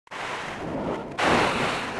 photo file converted in audio file
photo, audio